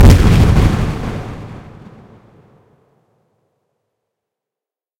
A totally synthetic explosion sound that could be the firing of a large gun instead of a bomb exploding. The reverberant tail is relatively short, in contrast to many others in this pack. Like the others in this series, this sound is totally synthetic, created within Cool Edit Pro (the ancestor of modern-day Adobe Audition).
bomb, gun, good, blast, synthetic